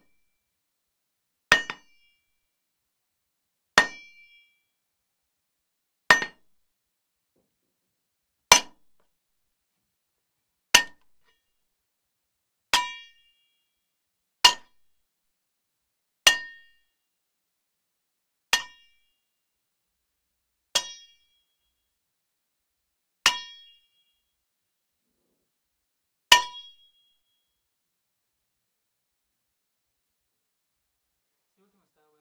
Machete vs frying pan 3
machete frying pan hit collision fight